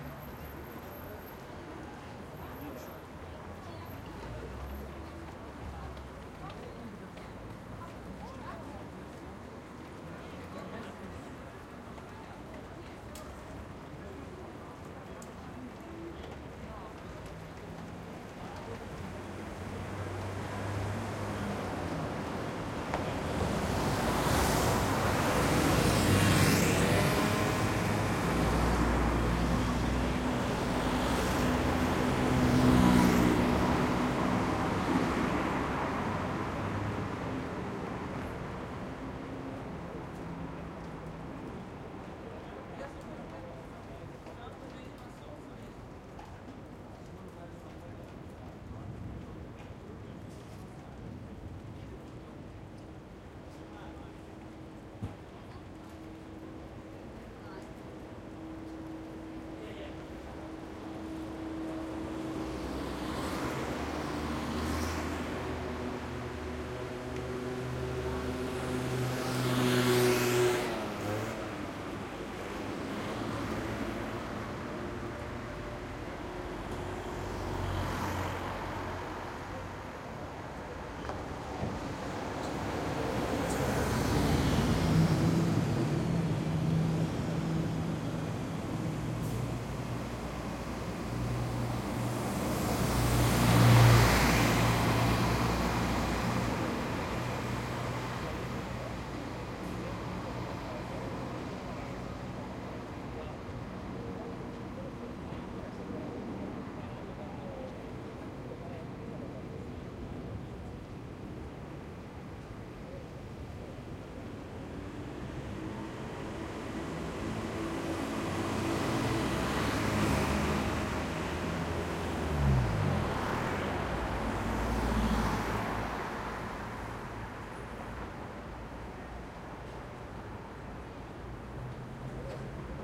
4ch surround recording of the Ulica branitelja Dubrovnika in Dubrovnik / Croatia. It is early afternoon in high summer, lots of traffic, cars scooters, buses and pedestrians, are passing.
Recorded with a Zoom H2.
These are the REAR channels of a 4ch surround recording, mics set to 120° dispersion.